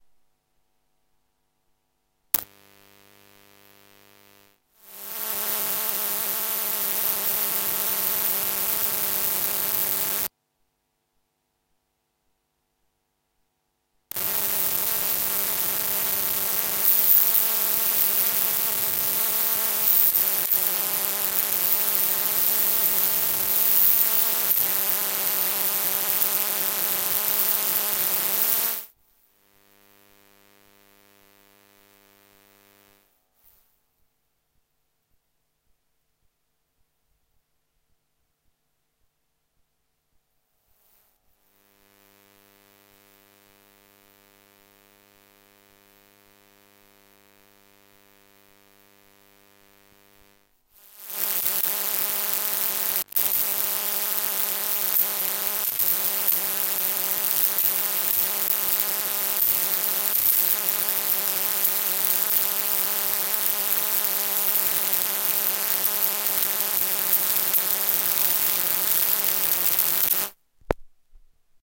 Broken Energy Saving Lightbulb 02
An energy-saving lightbulb in my living room started blinking. (You know the ones with the swirly spiral?)
Before replacing it I recorded the electronic noise it makes using a Maplin TP-101 Telephone Pick-Up connected to my Zoom H1.
This is the second recording which includes the noises of switching the light on and off. The lightbulb would go through periods of staying on normally (no blinking) where it was mostly silent(or slight buzz). And then would go into this very irritating random flickering, when the pick-up coil would get all this electronic noise.
If you think the noise is irritating, the light flickering is actually much worse! You could not stay in the room for more than a couple of minutes without going mentally insane.
Note that when flickering the lightbulb produces no audible noise. The noise is only electronic and cannot be recorded with a normal microphone. A telephone pickup or some other type of coil must be used to record it.
telephone-pickup, blinking, buzz, irritating, spark, energy-saving, lightbulb, coil, noise, electric, short-circuit, light, buzzing, malfunction, static, bulb